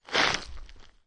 Papier Déchiré 2
misc noise ambient